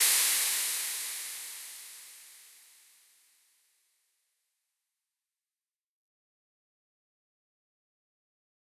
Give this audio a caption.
White noise boom
boom, crash, noise, white